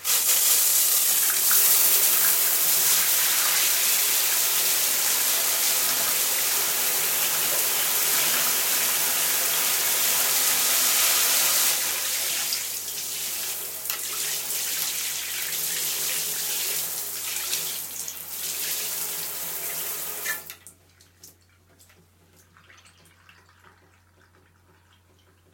Shower in the bathroom sound.